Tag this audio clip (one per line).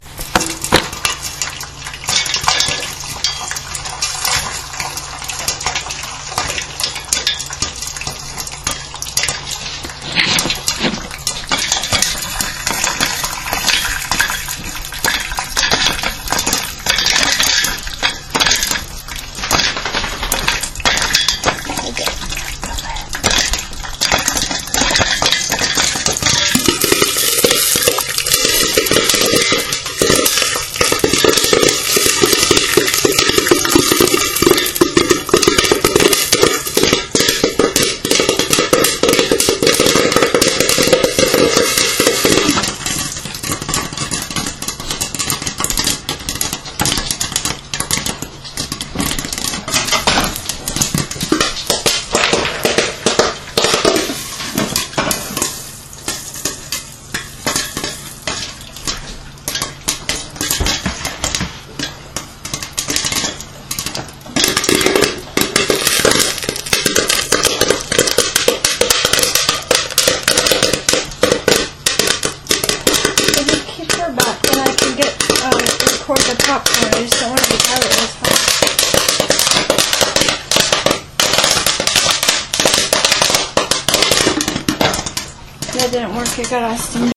corn
pop
pop-corn
popcorn
popping